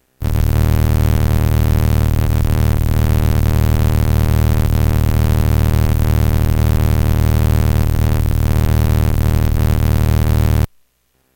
Some kind of weird rumbling feedback from modifying the Korg Monotribe's bass drum decay time.